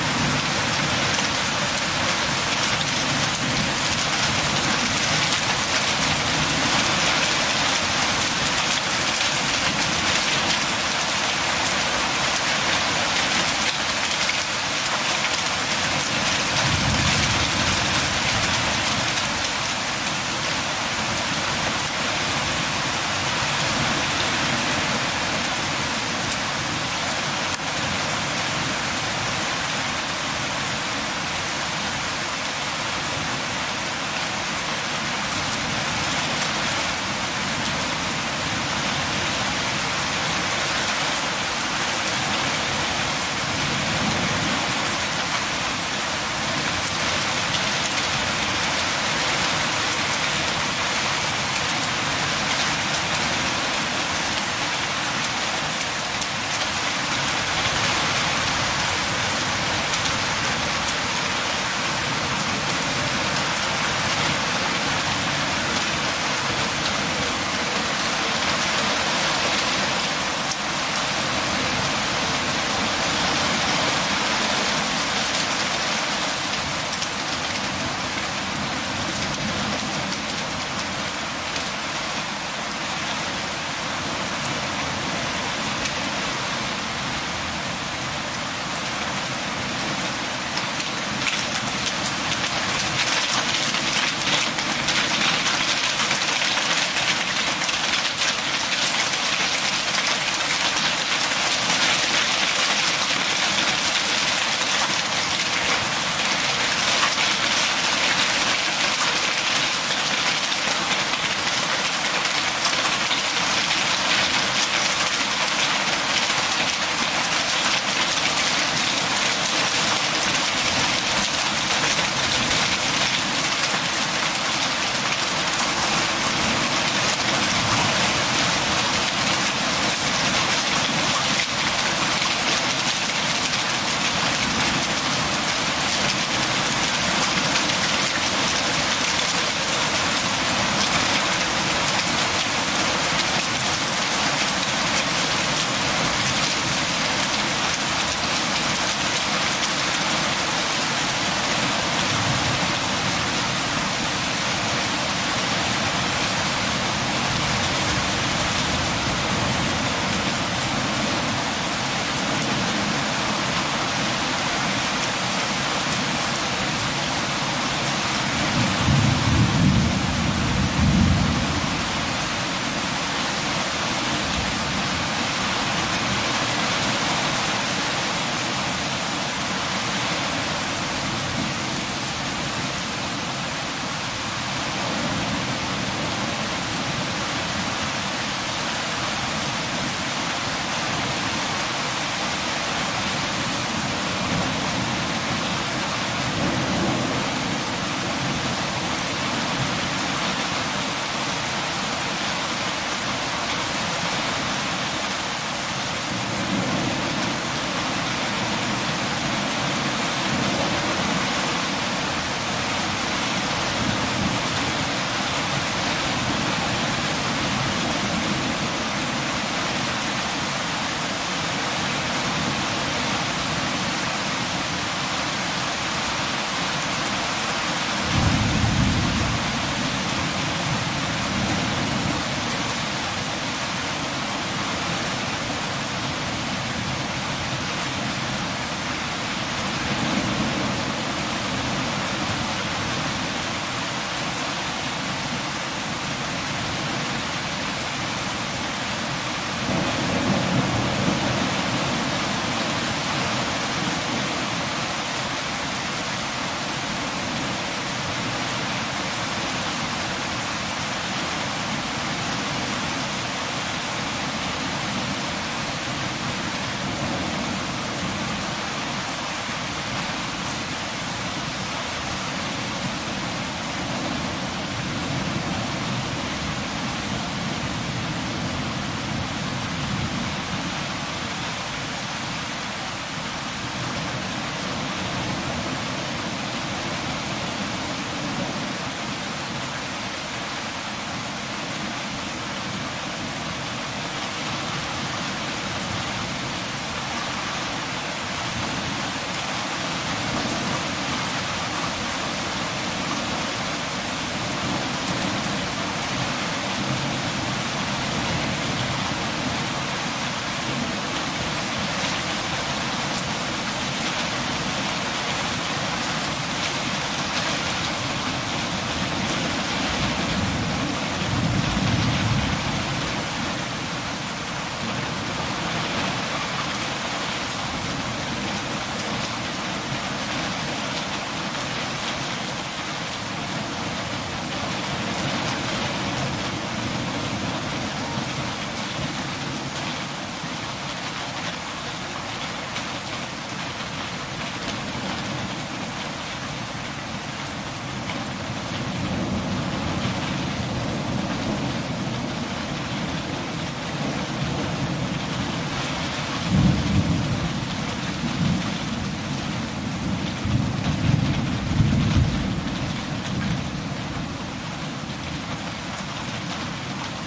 powerful rain, thunder and hailstorm
i recorded in Germany 2013.
A powerful storm including rain, thunder, hailstorm
sound, regen, thunder, rain, Hagelsturm, relaxation, hagel, sleep, thunderstorm, weather, hailstorm, nature, gewitter, deutschland, relax, germany